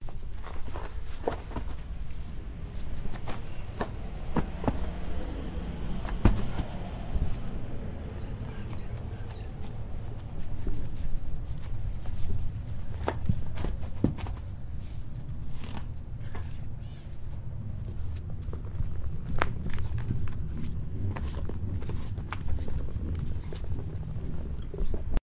Tire Roll
Rolling of a tire
Roll, Rolling-tire, Tire